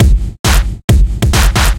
135 Humandfood Drums 02
drums, filter, guitar